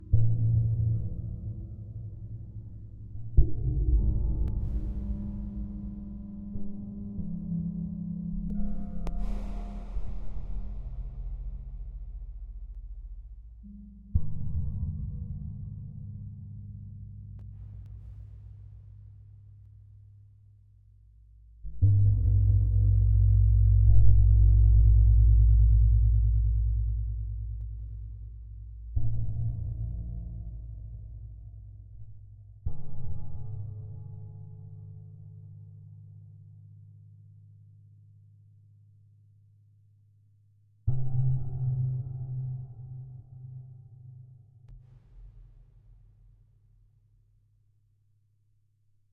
creepy spooky haunted dark deep void black darkness